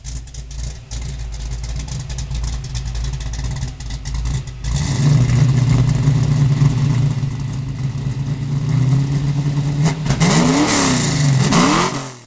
ford mustang v8 rear 2
engine, v8